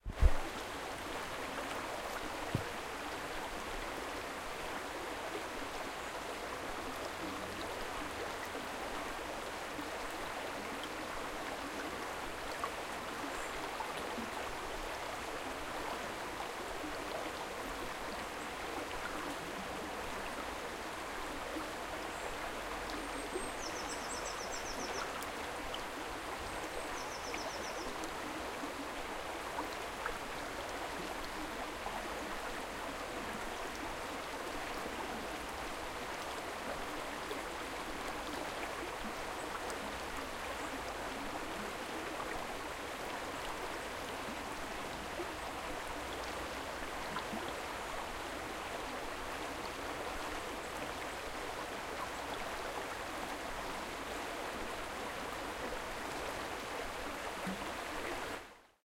rushing stream in the woods
stream, woods, rushing